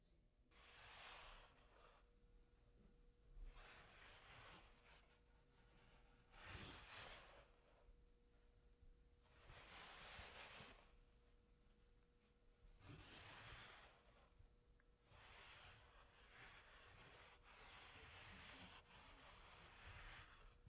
Cloth scraping 1
Cloth for foley